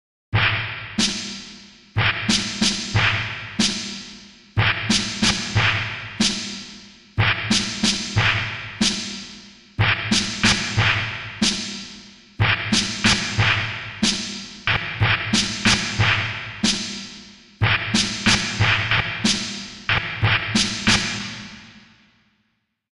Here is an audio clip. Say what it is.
First drum loop for my next song. 92bpm. Not too intricate.